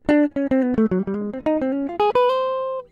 guitar be-bop3
Improvised samples from home session..
acid, funk, fusion, groovie, guitar, jazz, jazzy, licks, pattern